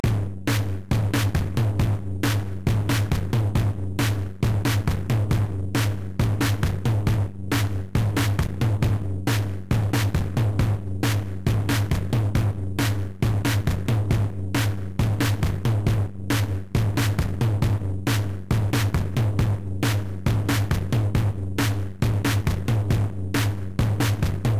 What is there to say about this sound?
Kastimes Drum Sample 5
drum-loop, drums, groovy, percussion-loop, sticks